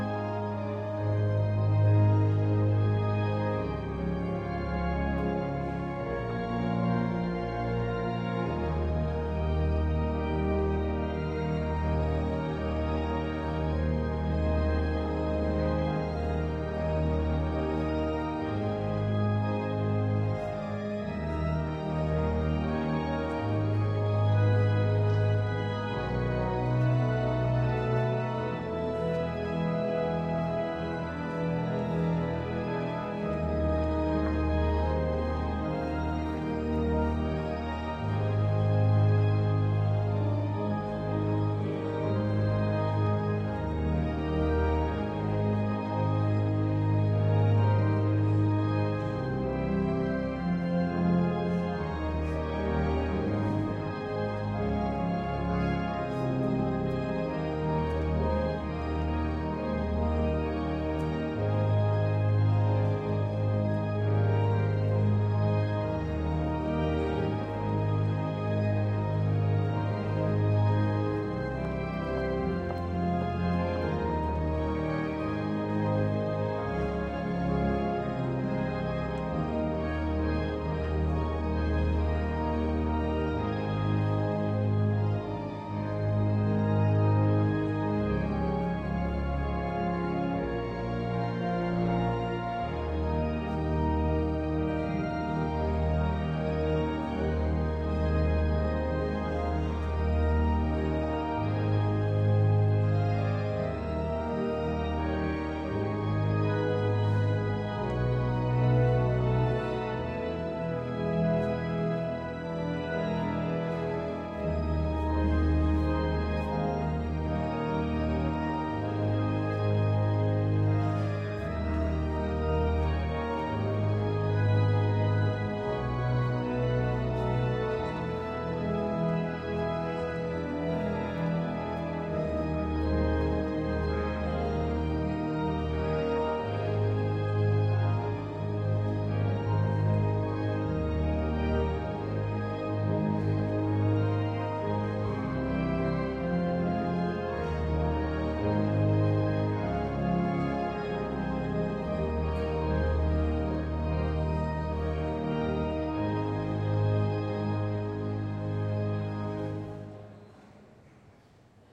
Some organ music